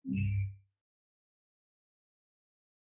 Soft indicator of error.